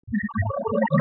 Bursting little clusters of sound created with coagula using original bitmap image.
ambient, space, synth